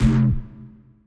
MS - Neuro 005
my own bass samples.
bass, bassline, dnb, dubstep, neuro